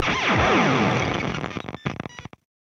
6505 raw tail
The first of a new sample pack hot out of the oven. I suspect this would not show up if I was feeding the amp with an actual guitar sound due to the small level of in-line noise. But I was feeding the amp with a short drum sample just to create this glitch effect.I used a reverse gate in Ableton Live to cut out the main part of the sound (the drum sample) which would be too loud and increased the gain so the tail sound was clearly audible.
crisp, electronic, glitch, amp-simulation, amp-modelling, noise, digital